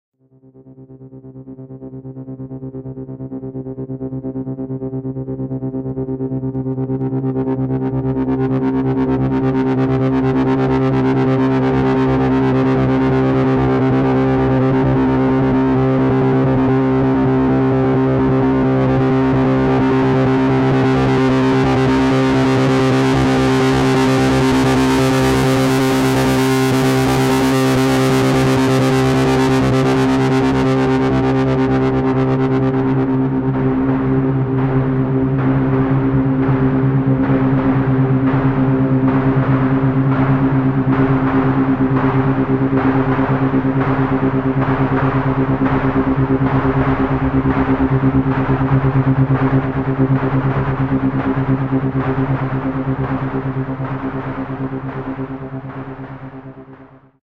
the tongue of dog number 7
space, wave, sounds, radio, SUN, future, star